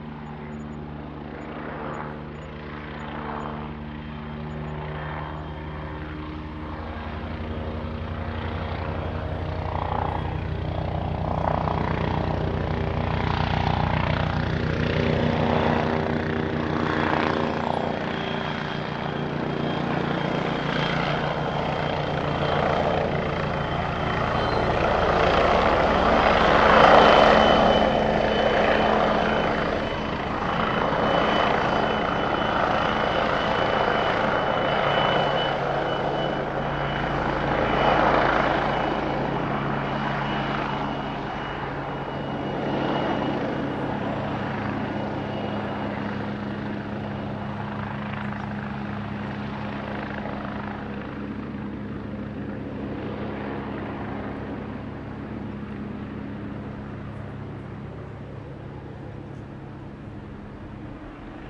police helicopter overhead. There were gusts of wind so the sound fluctuates. Sennheiser k6me66+akg ck94 into shure fp24 and edirol r09, decoded to mid-side stereo
city, engine, field-recording, police